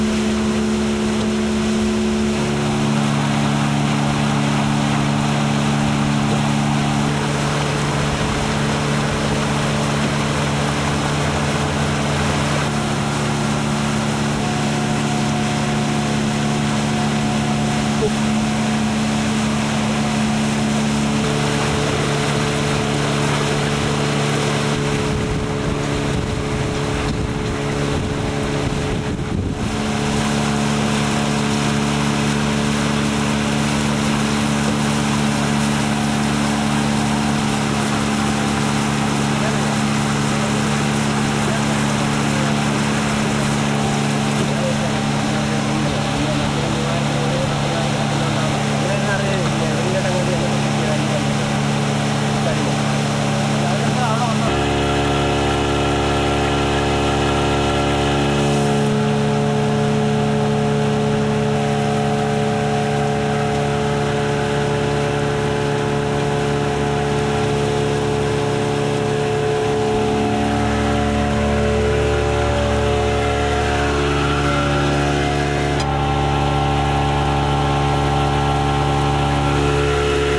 India Backwaters House-Boat 1 (Engine Sound, Waves, Low Voices)
India Backwaters House-Boat no. 1. The outboard engine was always on, so you hear its noise, the waves and low voices
Anchor, Backwaters, Birds, Engine, Field-recording, House-Boat, India, Voices, Water